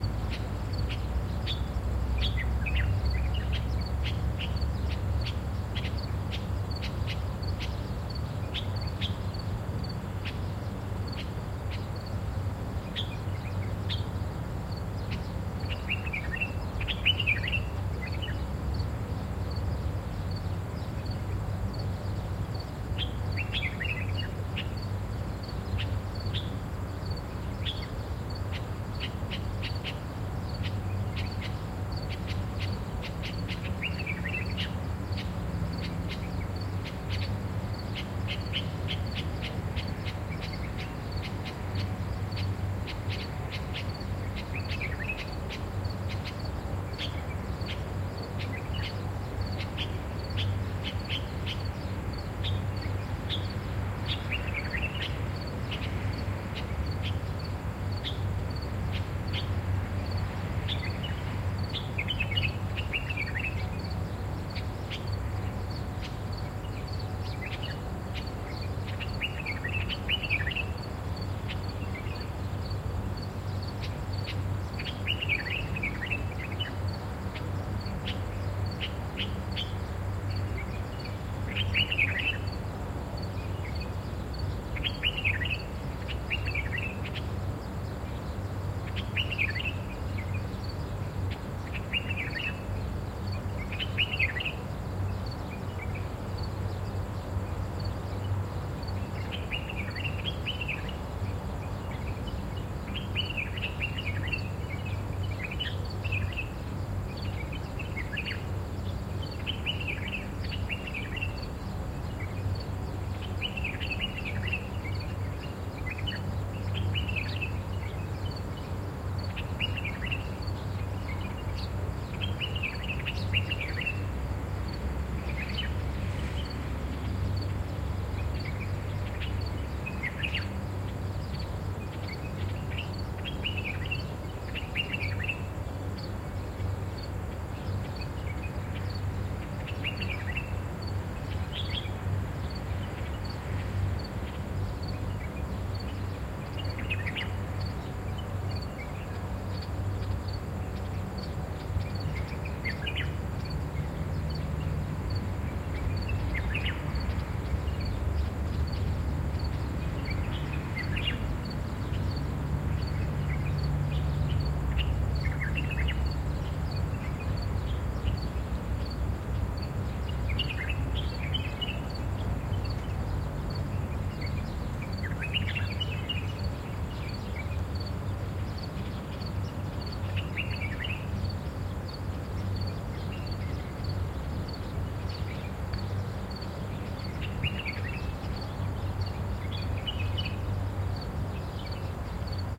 It is 5 am. I need some birdsounds for the project I work on. But the city is so loud. I only can do it very early in the morning. the background noise is due to the 1 million airconditioning machines in Abu Dhabi.